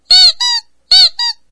This is a sample I did a while back when I was looking for a new default error sound for my computer. Taken from a old vinyl toy dog, cat and bear
cat, dog